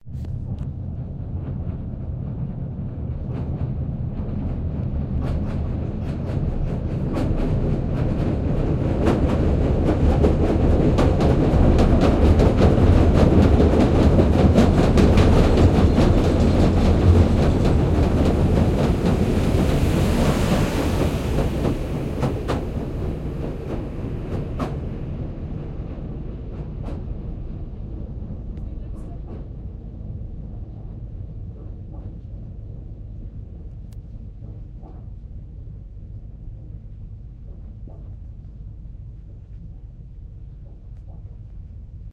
Southend pier train
The sound of the train on Southend Pier as it passes from the end of the pier to the mainland. Sea sounds in the background. Recorded on a Nokia Lumia 1520
field-recording, southend, train